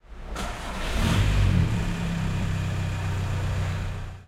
Car start
Sound of a car motor starting in big car park (noisy and reverberant ambience).
parking, starting